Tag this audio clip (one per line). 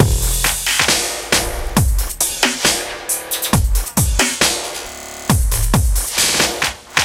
awesome
8-bit
drums
sample
chords
loops
synth
drum
hit
video
loop
synthesizer
melody
digital
samples
music
sounds
game